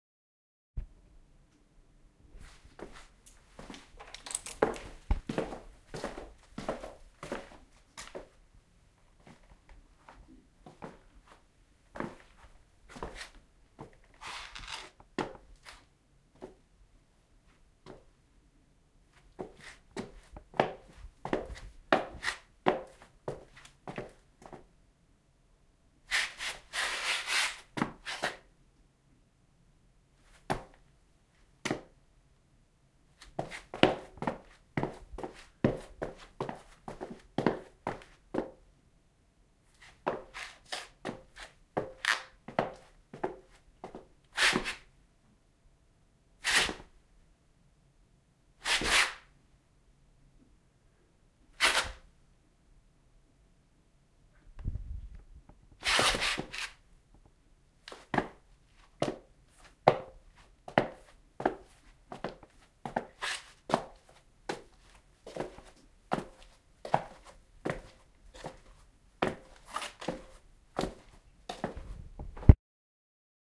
walking in on dusty cellar floor